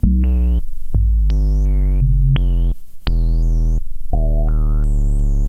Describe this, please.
SH-5-260 088bpm
Sample and Hold + VCF and manual filter sweeps
analog, filter, hold, roland, sample, sh-5, synthesizer, vcf